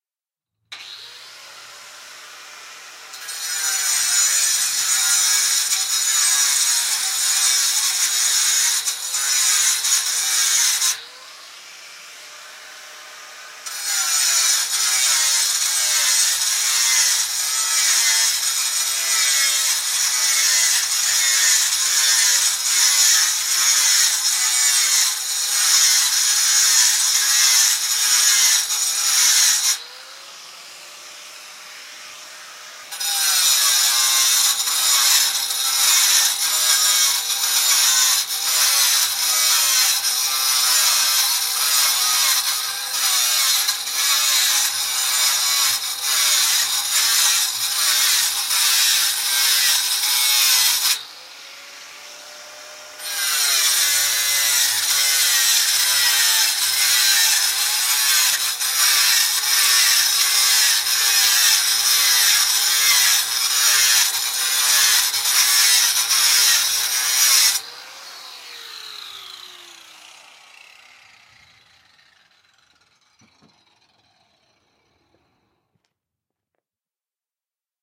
A mono field-recording of an angle grinder cutting steel weldmesh. Rode NTG-2 > FEL battery pre-amp > Zoom H2 line in.